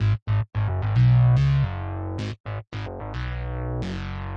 Grey Bas - gis - 110 BPM
110bpm, bas, loop